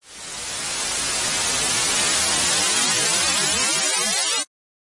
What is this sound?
WARNING! Bad noise :P!
WARNING! Really unfiltered noise sample made with Audacity! From a few years ago. :P
Brown, Unfiltered, Noise, White, Pink